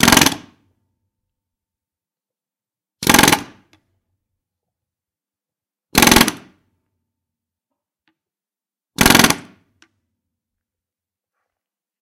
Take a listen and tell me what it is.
Pneumatic chipping hammer - Holman nc4 - Start 4
Holman nc4 pneumatic chipping hammer started four times.
chipping, pneumatic